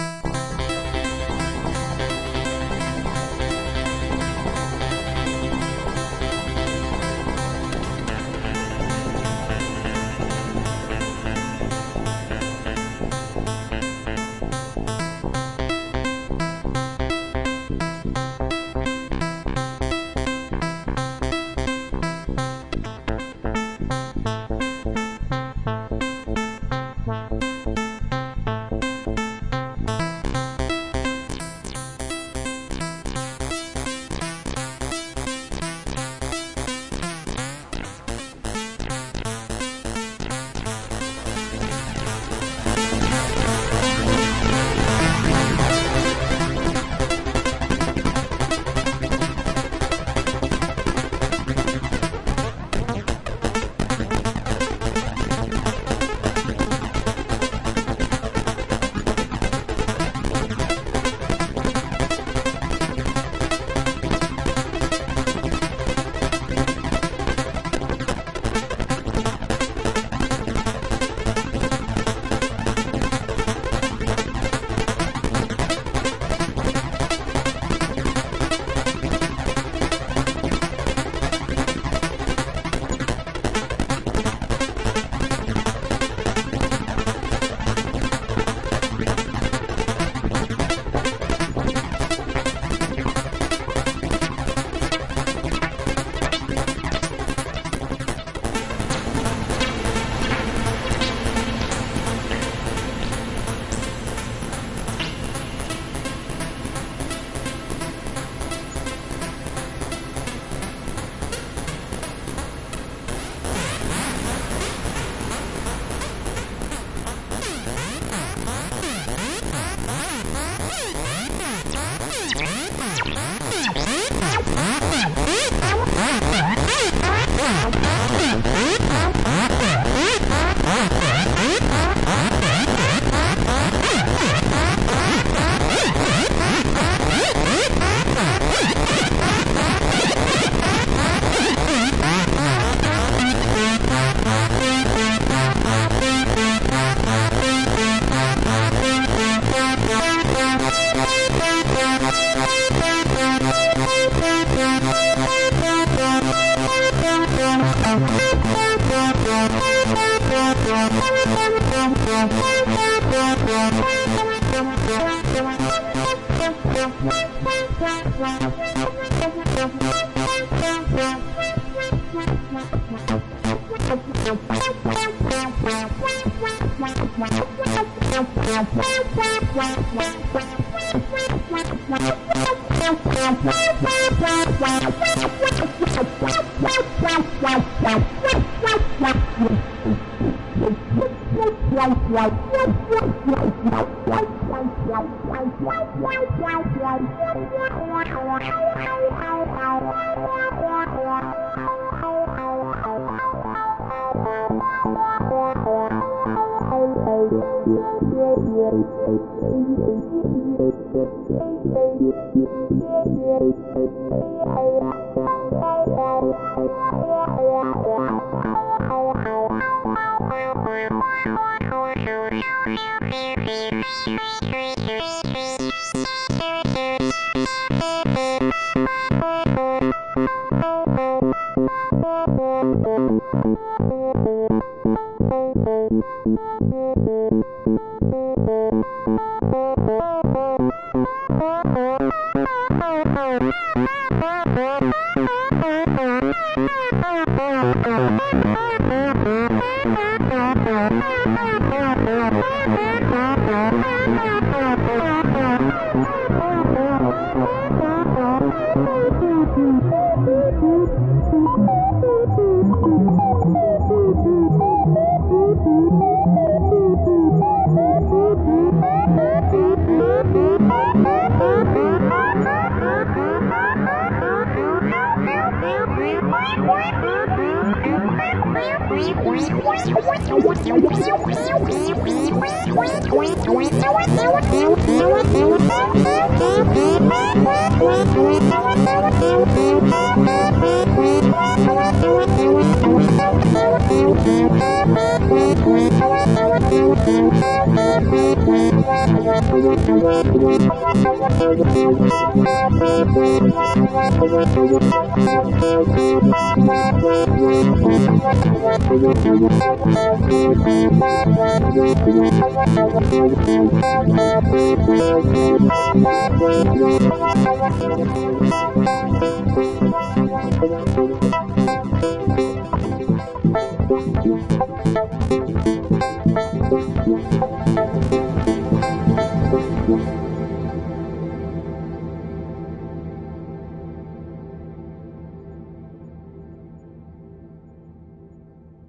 128 C Major Roland
128, Acid, Analog, Arpeggiator, BPM, Cmaj, House, Neotrance, Roland, Synthesizer, Techno